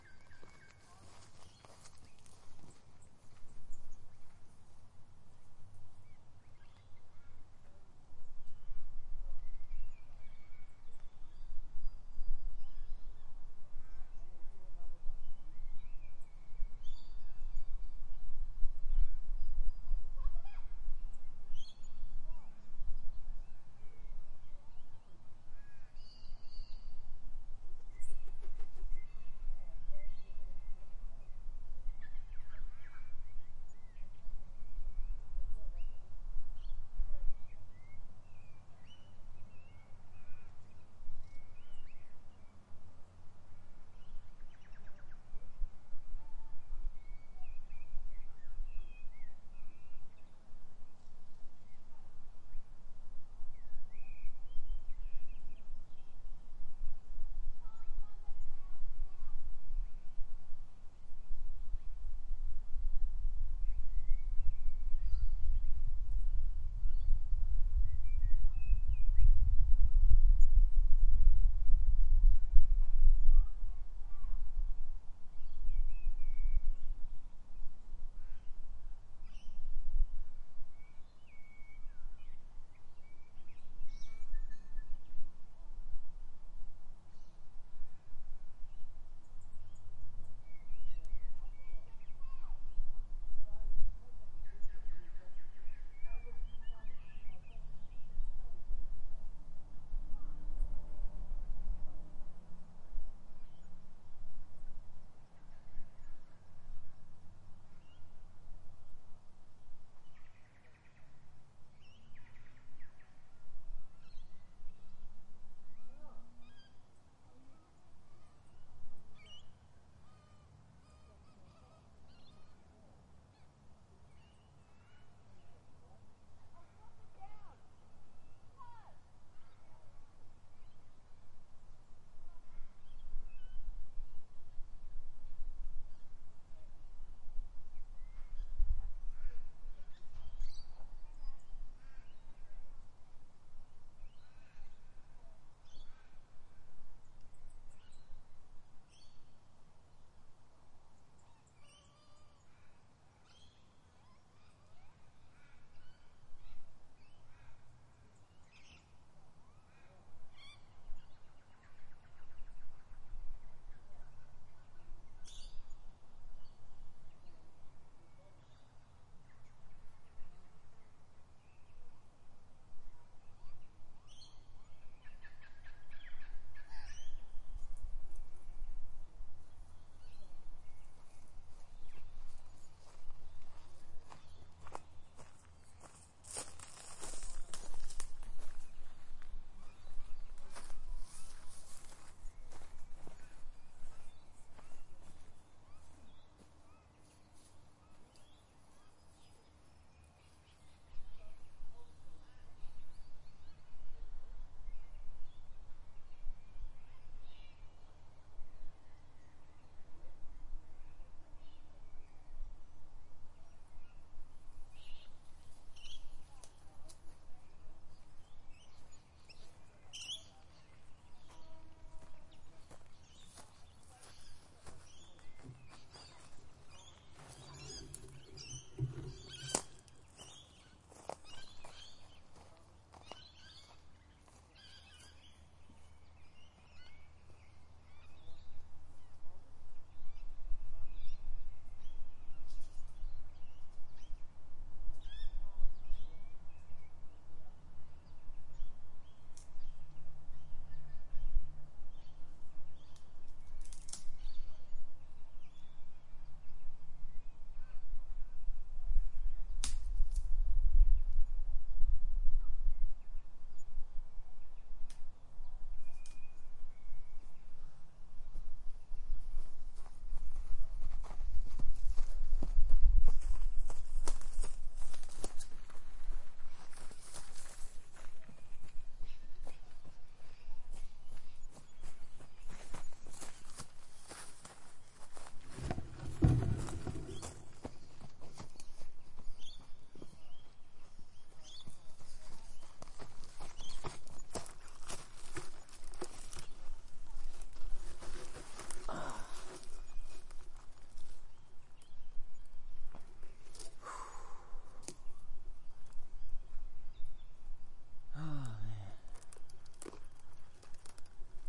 Australian Bush Atmos 01
Bush / forest atmosphere with wide stereo image and various sounds.
walking, blowing, birds, insect, chirping, Australian, trees, fly, flies, insects, wind, forest, valley, Australia, bush